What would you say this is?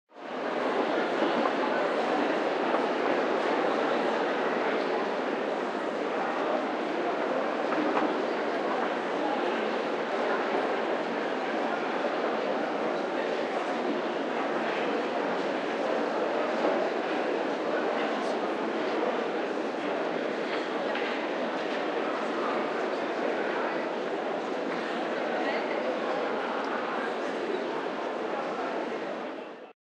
Huge crowd leaving a concert hall.
talking, public, orchestra, crowd, concert